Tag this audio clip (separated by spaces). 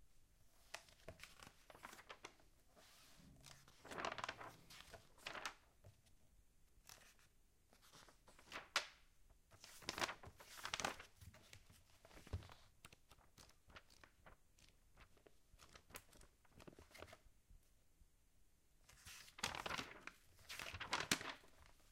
UPF-CS12 glance leaf through read magazine